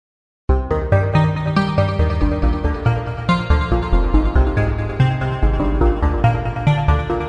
A Little Synth appregio sammple
140, beat, bpm, dubstep, hiphop, loop, synth